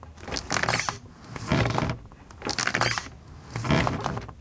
One of a selection of recordings of a squeaky door.
creaking, door, halloween, hinges, squeek